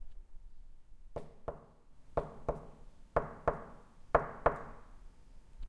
Knocking on a wooden table